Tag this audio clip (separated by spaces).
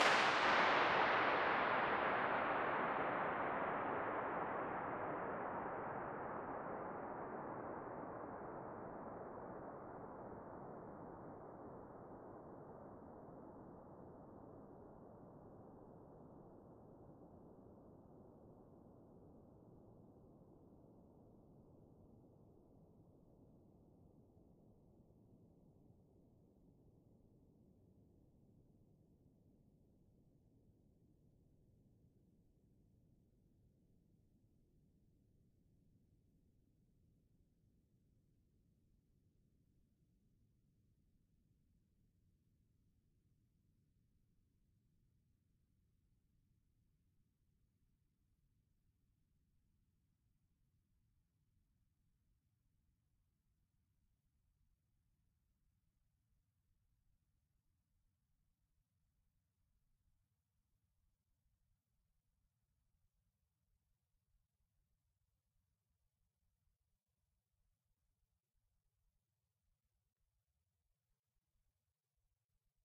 Allan-Kilpatrick echo longest-echo oil-storage oil-tank rcahms reverberation-time